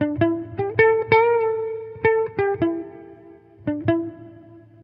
electric guitar certainly not the best sample, by can save your life.